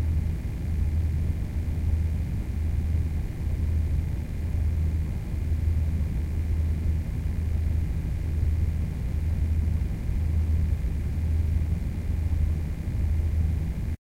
Fan Drone Sever Room
An up close recording of the front fans on my computer at semi-idle, with some minor processing. The recording as an unbalanced movement to it, perfect for looping as a server room drone.
Ambience, Drone, Electronic, Sever, Fan, Dark, Computer